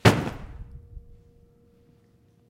Heavy bundle (linen) dropped near microphone on concrete floor. With imagination, it could sound like a body falling to the ground.
Recorded with AKG condenser microphone M-Audio Delta AP